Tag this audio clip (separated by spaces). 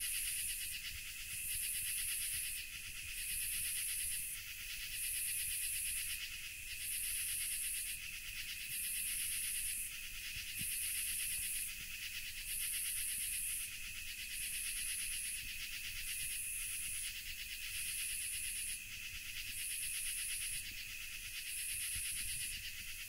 bugs nature